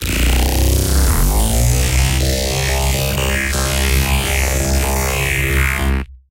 DIA S15 Warped Bass - B (25)
Cutted parts of an audio experiment using Carbon Electra Saws with some internal pitch envelope going on, going into trash 2, going into eq modulation, going into manipulator (formant & pitch shift, a bit fm modulation on a shifting frequency at times), going into ott
Carbon-Electra, Distortion, EQ-Modulation, FM, Formant-Shift, Freestyle, Frequency-Modulation, Frequency-Shift, Manipulator, Pitched, Pitch-Shift, Sound-Design, Trash2